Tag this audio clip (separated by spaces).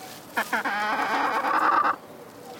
animal Chicken farm